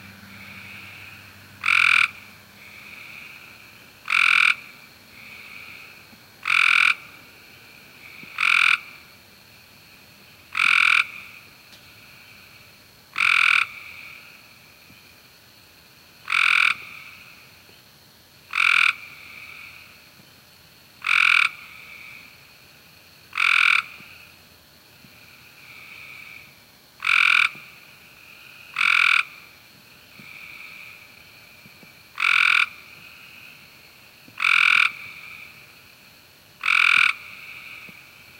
Trill trill croak
Common American toad calling out during the night near a pond in gallant,Alabama.